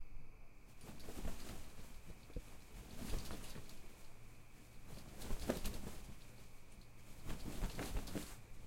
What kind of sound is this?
160170 wind blowing curtain OWI
Wind Blowing a Curtain on a windy day
Curtain, Room, Wind